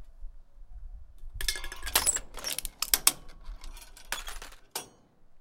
Crushing an aluminum can in the backyard with our can crusher.
crushing, aluminum, crunch, can, metallic